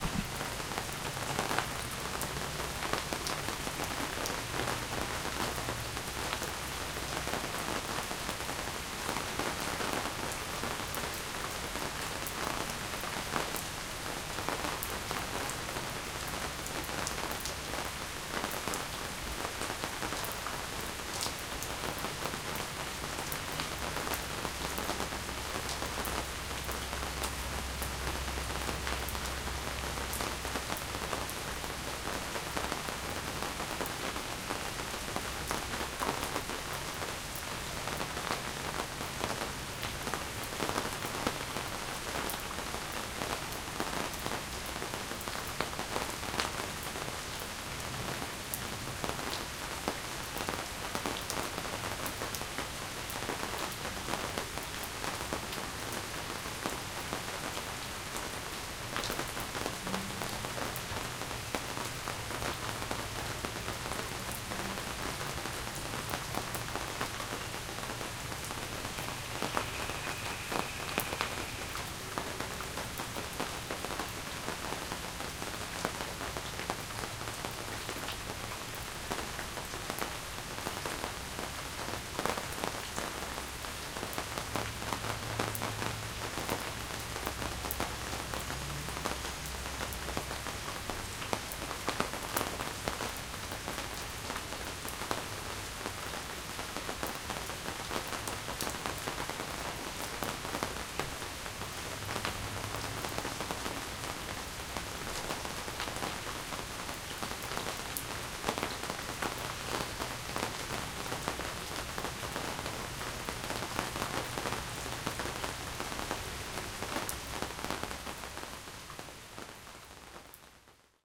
Under the Awning, Light Rain
Late afternoon. Sitting under an awning in my Long Island, NY backyard listening to rain. Recorded with a Zoom H1n.